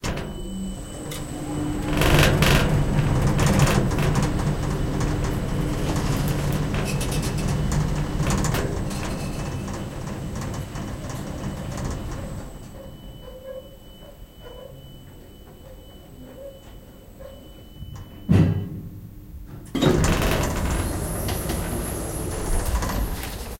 Sound of noisy elevator. Recorded with Zoom H1.
elevator, steps, building, lift, servo